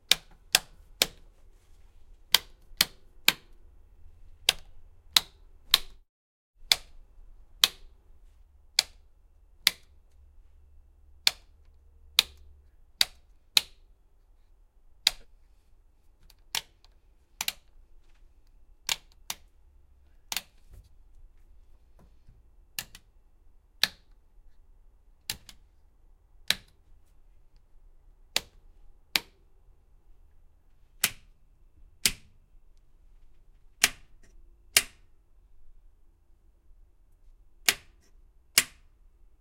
school bus truck int switches on off various
off, truck, switches, school, bus, int